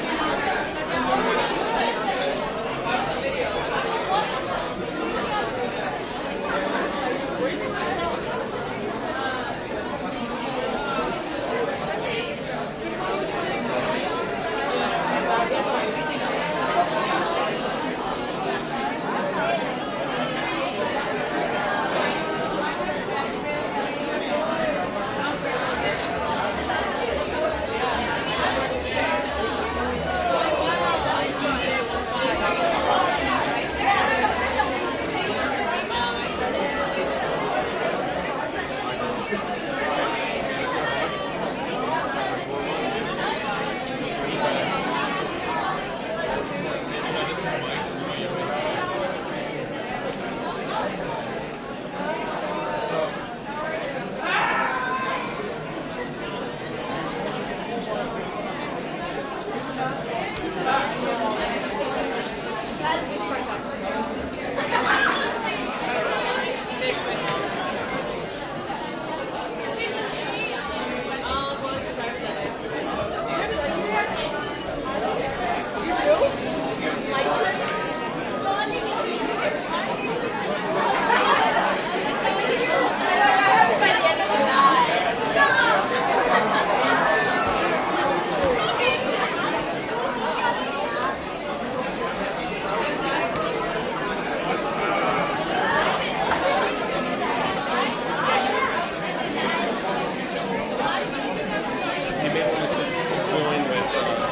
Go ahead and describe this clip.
crowd int large wedding reception carpeted hall
carpeted, crowd, hall, int, large, reception, wedding